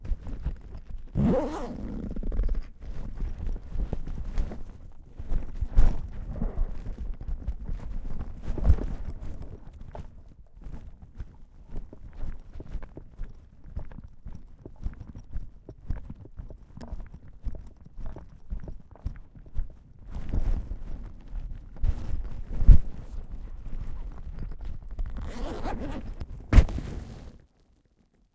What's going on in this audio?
I just get a strange and stupid idea. I put a Zoom H1 into a bag and walk around. Not sure if this is useful but here it is.